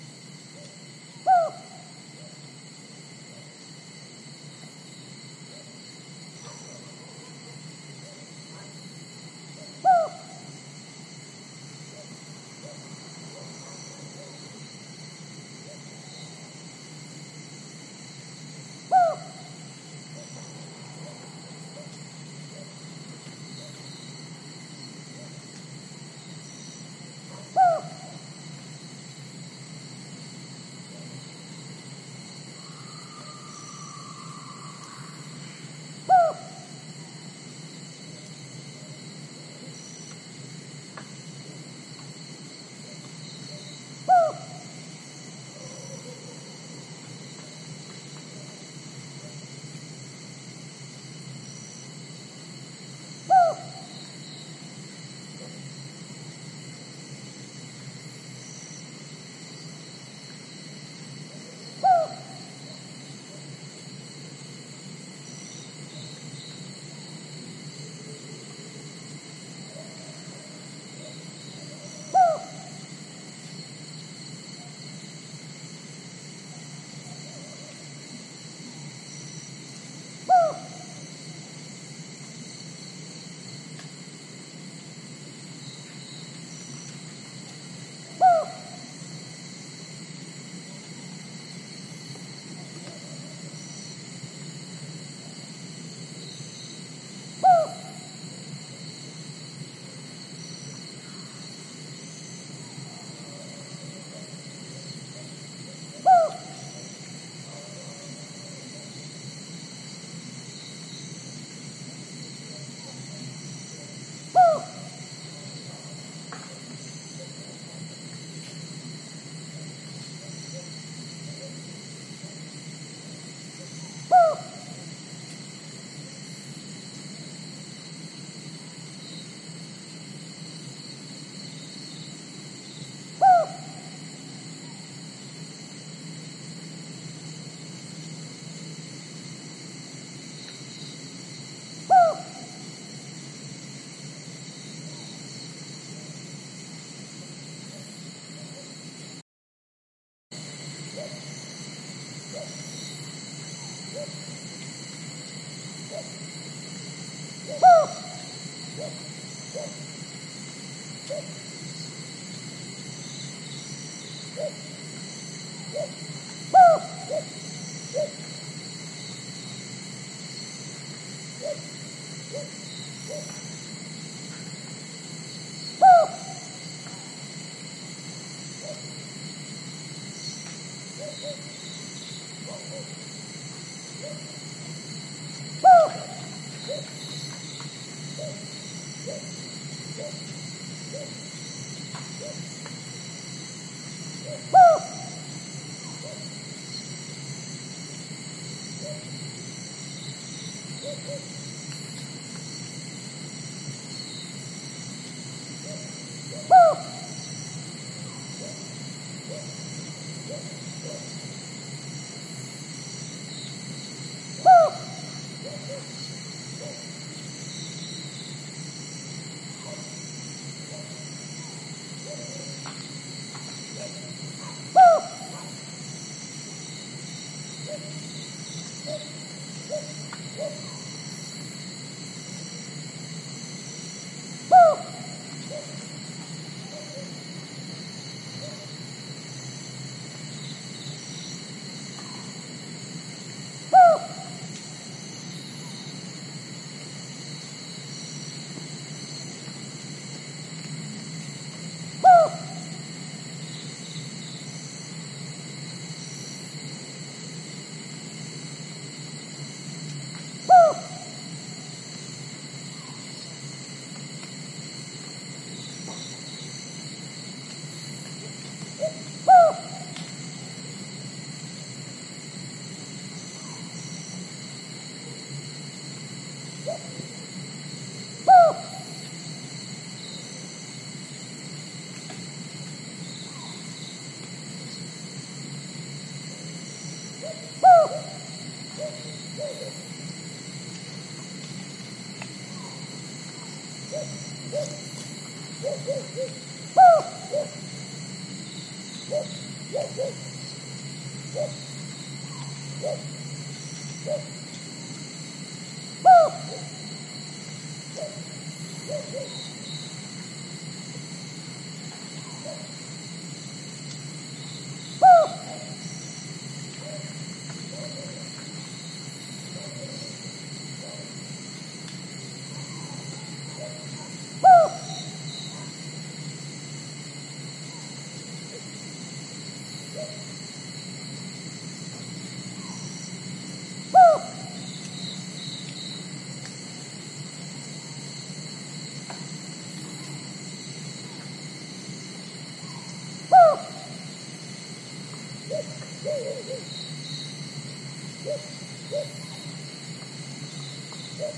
Recorded in Chiangmai. Sound Devices 664, two Sanken CS-3e (cardioid) in ORTF. Some unknown bird (seems like cuckoo), cicadas.
cicada, cuckoo